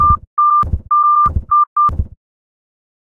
Sonido 1 Medio ejercicio 1
grave, sounds, acute